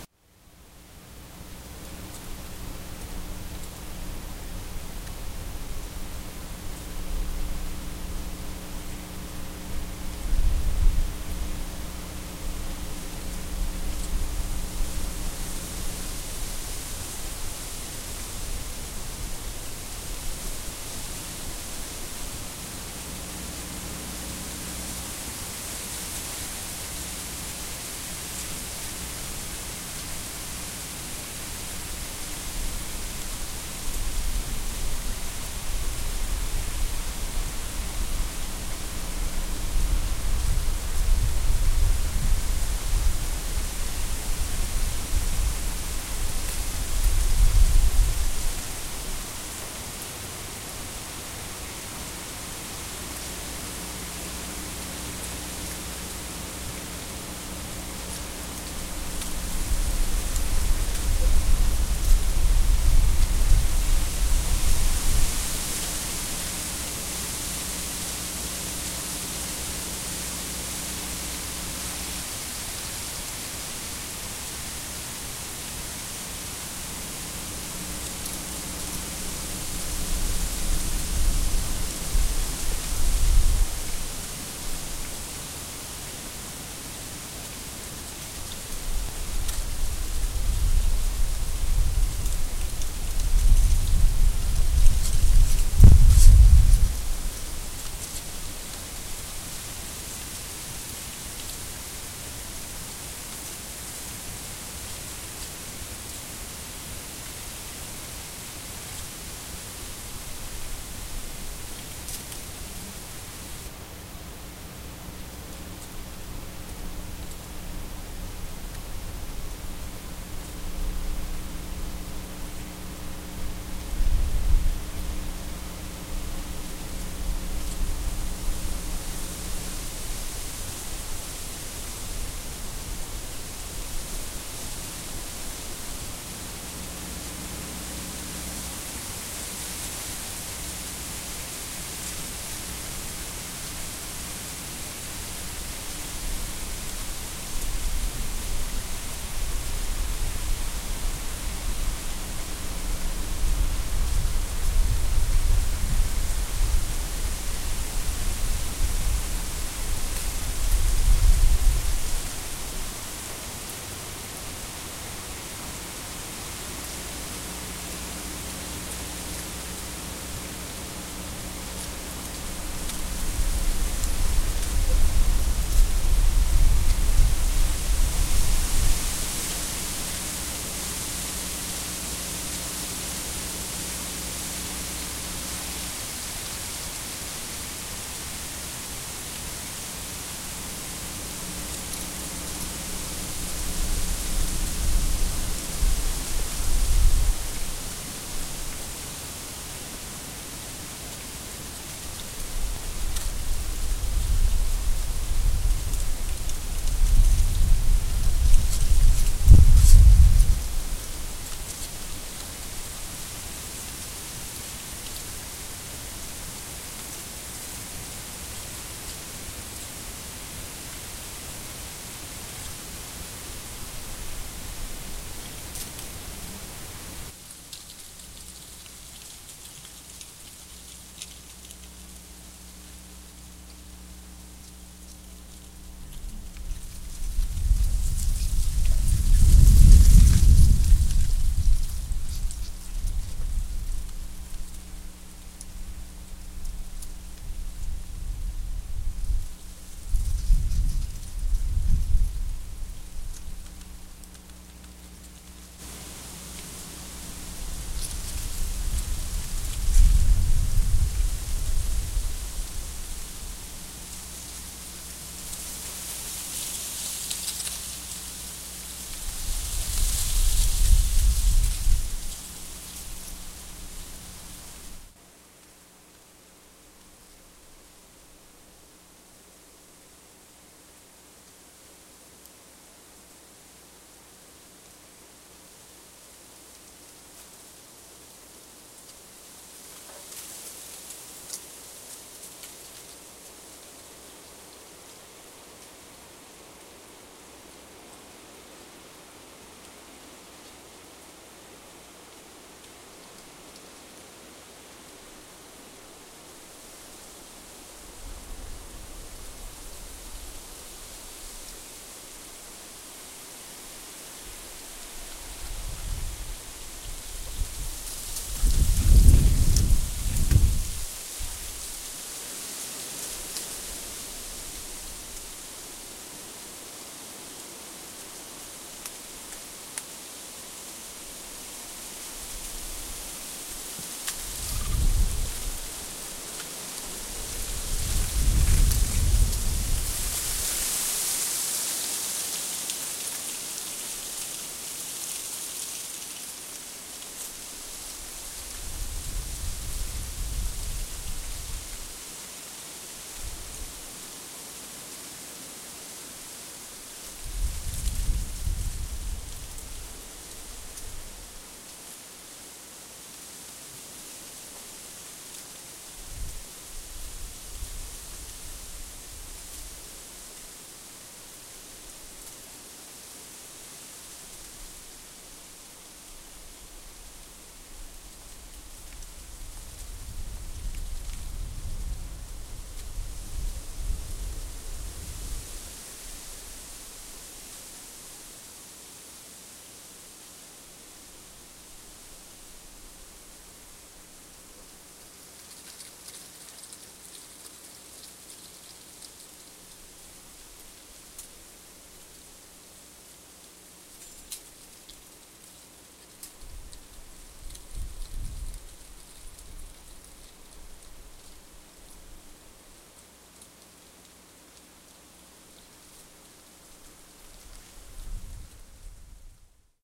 Dry Leafy Gusts
Gentle gusts of wind playing through a yew tree and surrounding dry oak leaves. This is a low-tech mono recording using an electret mike plugged into a laptop. Still, the dry leaves and twigs have chime-like charm.
ambiance, field-recording, leaves, loopable, tree, twigs, wind